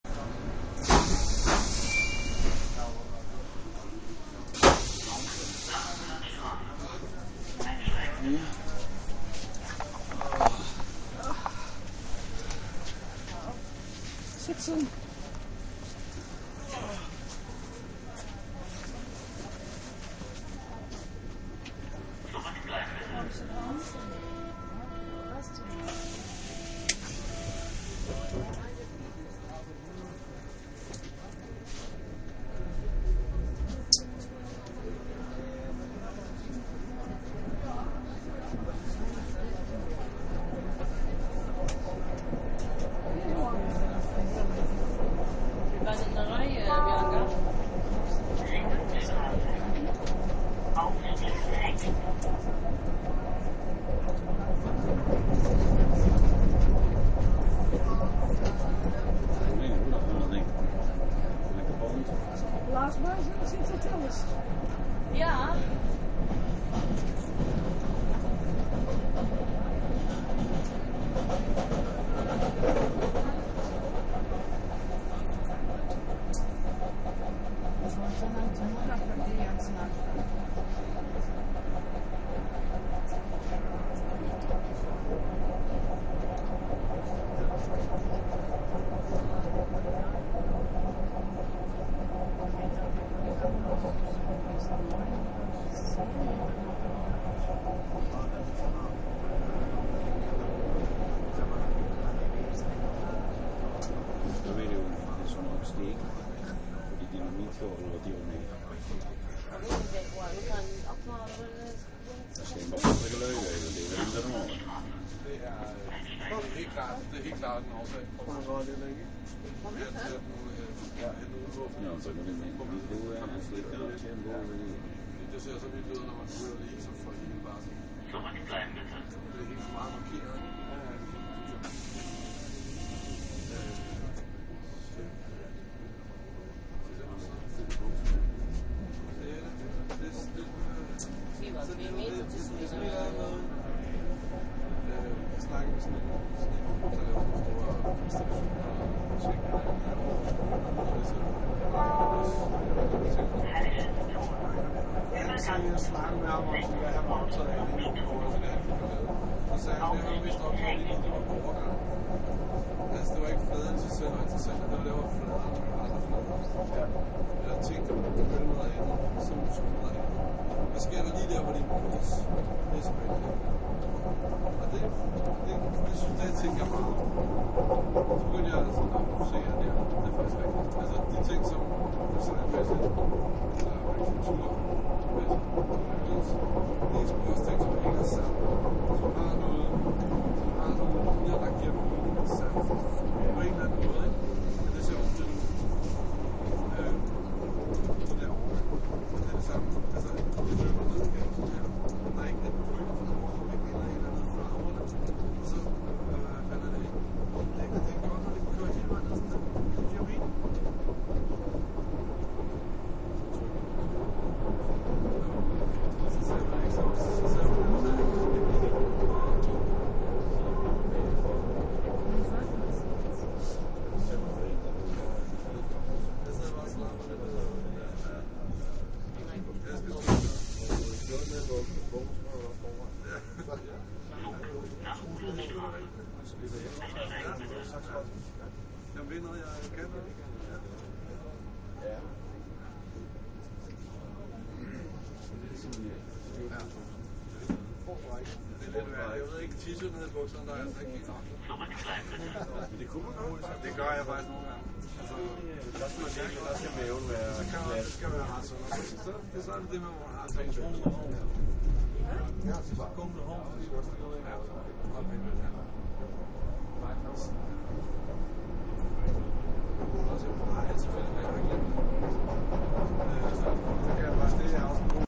riding an ubahn train in berlin. people chatting stations being announced doors opening/closing traintrack sounds in the background.